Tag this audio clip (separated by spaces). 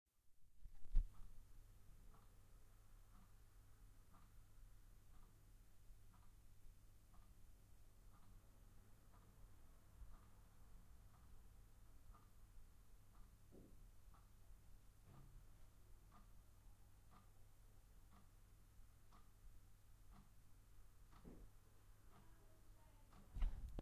anxiety clock sound ticking tick-tack time